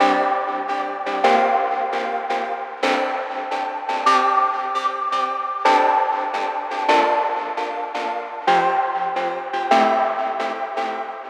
Back, Ground, Maj
Tape-fodder, mangled atmospherics, musical.
misaligned, musical, snippet, tape-fodder, warbled